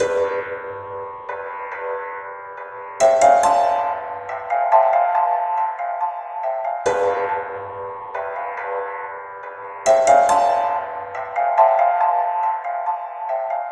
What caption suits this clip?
Suspend Piano (Am - 70 BPM)
Mystic Piano FX Loop. Key: Am, 70 BPM
Dark, Film, FX, Loop, Movie, Piano, Sound, Suspend